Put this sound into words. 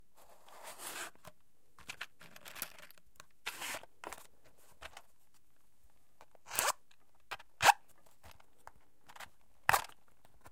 Matchbox and Failed Strike (1)
In this series of recordings I strike many Cook's safety matches, in a small plaster-boarded room. These sounds were recorded with a match pair of Rode M5 small diaphragm condenser microphones, into a Zoom H4N. These are the raw sound recording with not noise reduction, EQ, or compression. These sounds are 100% free for all uses.
burning, fire, flame, match, matchbox, Rode, strike